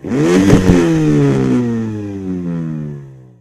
A recording of a broken electric motor, modified and time-shifted to sound like a V12 Engine.